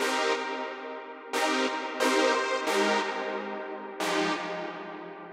brass loop
a short loop with reverb